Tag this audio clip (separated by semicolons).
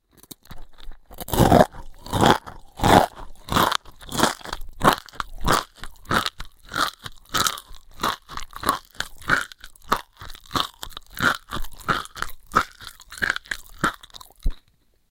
eat; food; bones; zombie; evil; eating; horror; monster; dinner; lunch; meal; undead